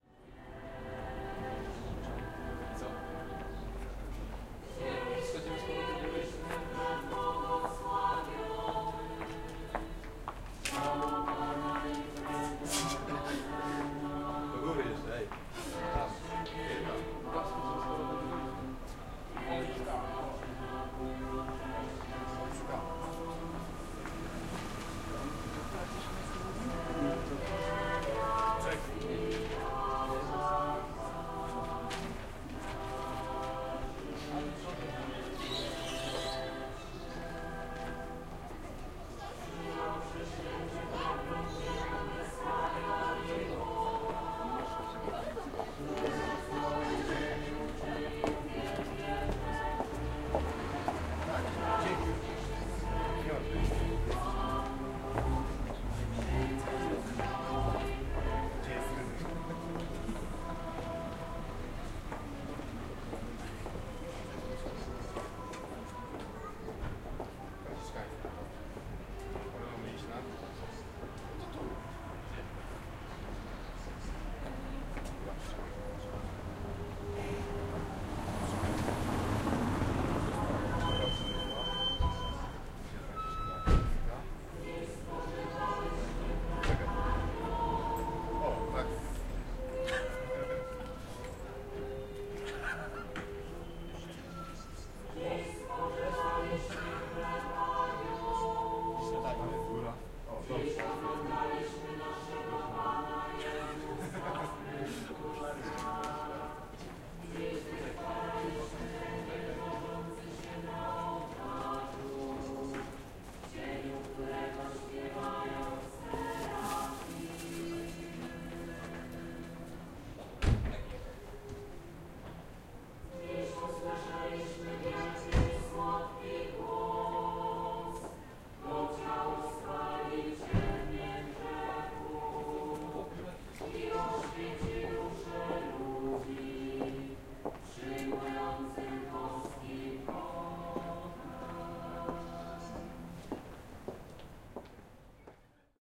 singing nuns 180312
18.03.2012: about 6 p.m. In front of Saint Antoni Paderewski Church in the center of Poznan on Franiszkanska street. Mix of street sounds with singing prayer. Prayer was audible by open window.